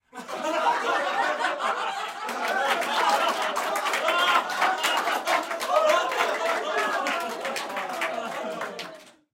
Recorded inside with about 15 people.
cheer, applaud, people, applause, group, adults, inside, audience, hand-clapping, clapping, crowd, cheering, theatre